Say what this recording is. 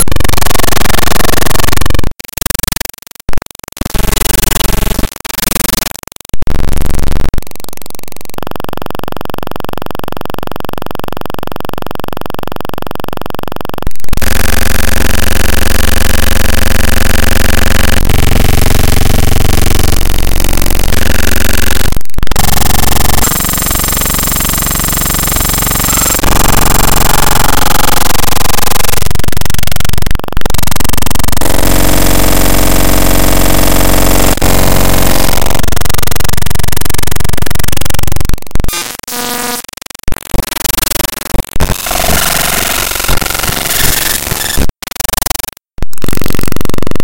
Glitch collection
A series of interesting glitches made in audacity
digital, glitch, noise, sound-design